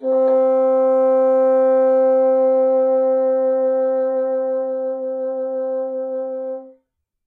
One-shot from Versilian Studios Chamber Orchestra 2: Community Edition sampling project.
Instrument family: Woodwinds
Instrument: Bassoon
Articulation: vibrato sustain
Note: C4
Midi note: 60
Midi velocity (center): 42063
Microphone: 2x Rode NT1-A
Performer: P. Sauter
midi-note-60, woodwinds, multisample, bassoon, midi-velocity-105, c4, vsco-2, single-note, vibrato-sustain